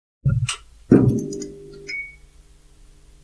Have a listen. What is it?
Fluorescent lamp start 9
fluorescent tube light starts up in my office. Done with Rode Podcaster edited with Adobe Soundbooth on January 2012
office, start, switch, fluorescent, light